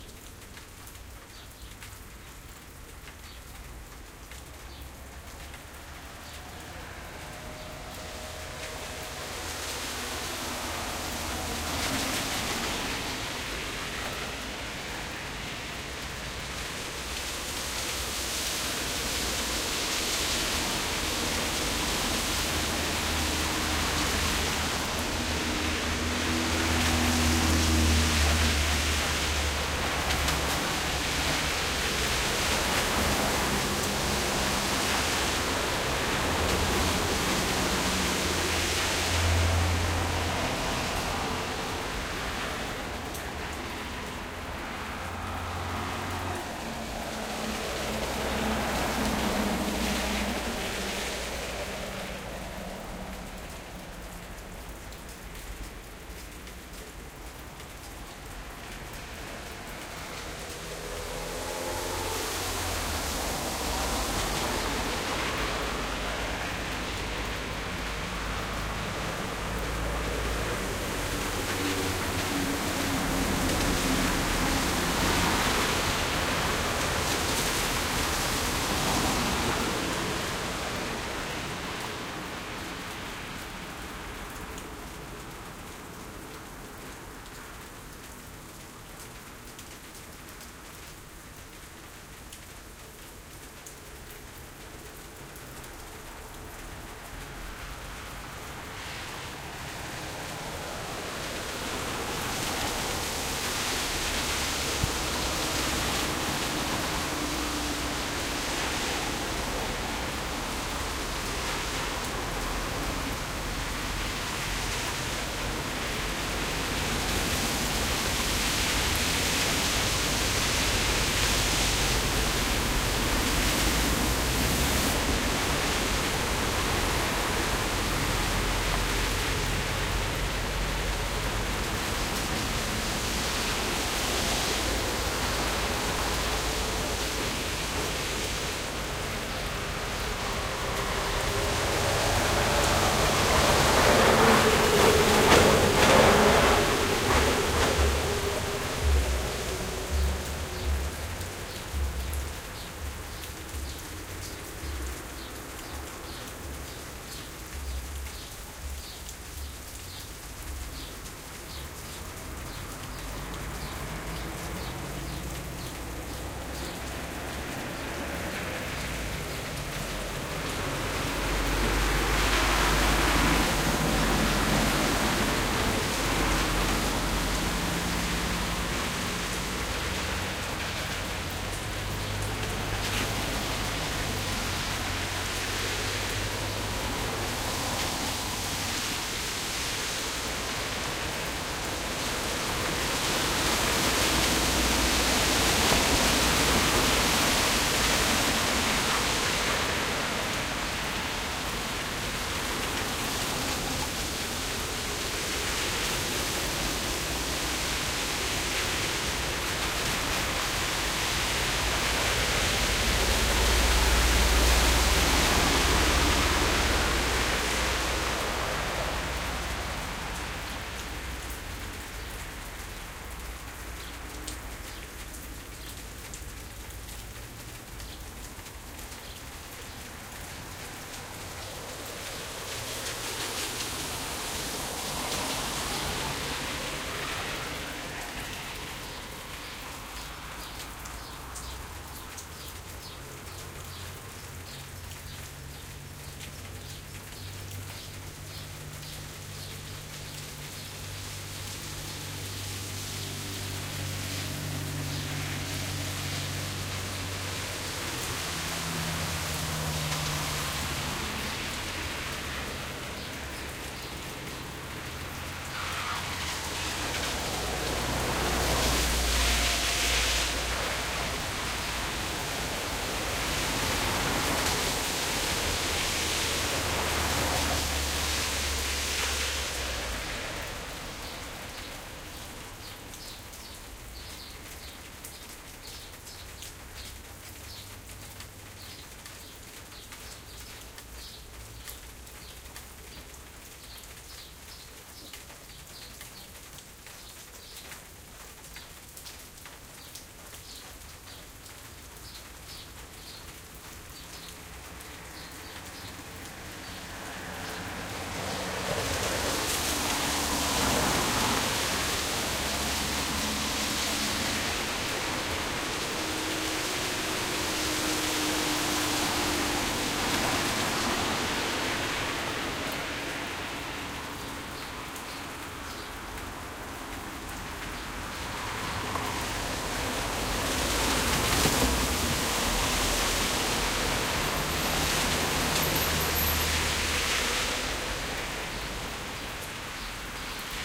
panphilova rainy morning 9am sunday
Noise of the Panphilova street, Omsk, Russia. 9am, sunday. Rain. Noise of cars and truck.
XY-stereo.
morning, car, athmosphere, noise, rain, street